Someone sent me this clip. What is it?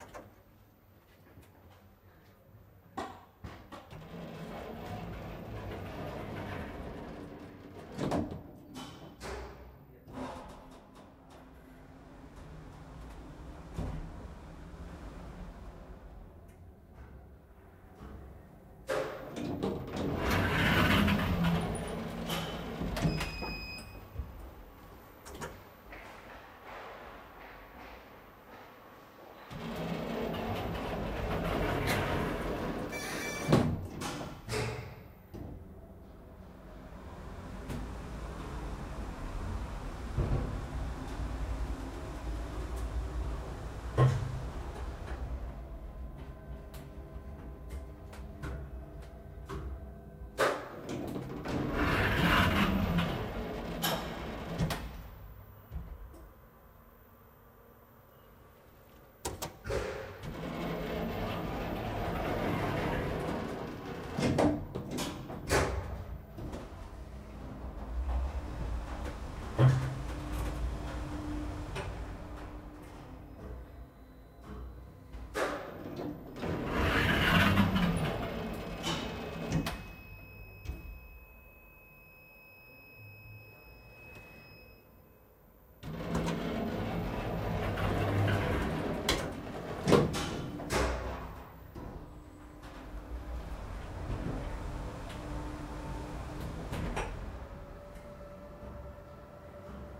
SFX ELEVADOR 4824 01
Elevator sound recorded in Porto during a tv series shooting set between takes.
zoom f8
sennheiser MKH8060